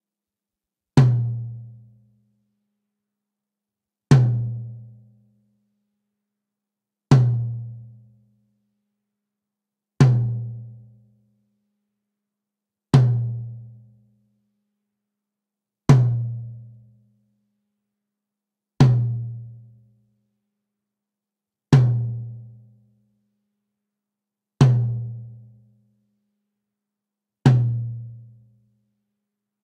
recording of a high rack tom drum